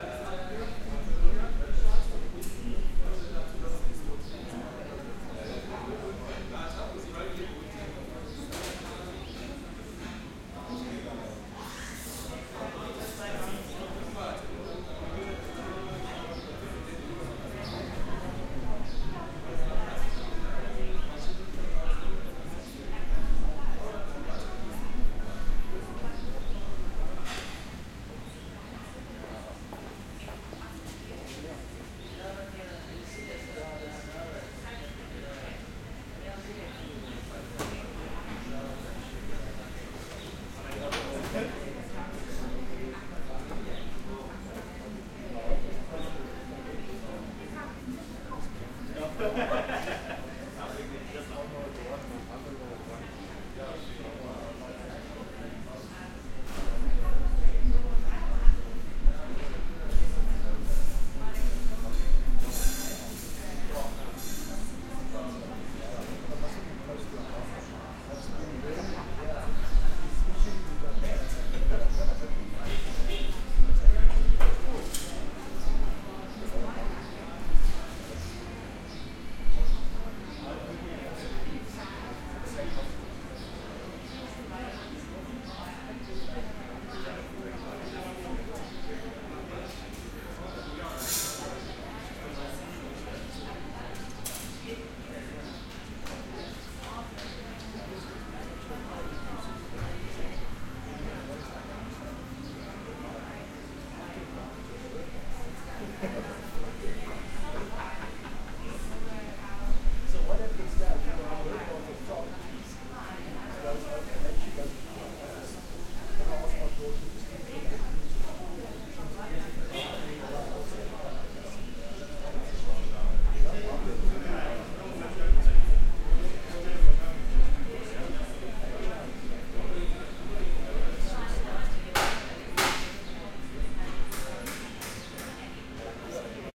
Cofee shop Ambience
Coffee Shop Ambience Recording. Loopable. Crowd can be heard talking but the individual words are not audible. Blender and Coffee machine can be heard. Recorded indoors at a street side coffee shop so slight traffic can be heard. Recorded with a Zoom H6 Recorder. Loud atmospheric and noisy ambience.
ambience, coffee, crowd, public, restaurant, OWI, noise, cafe